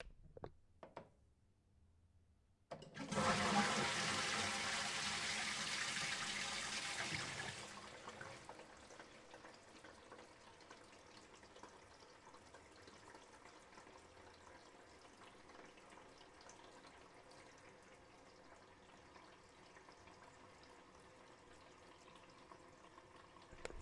A flushing toilet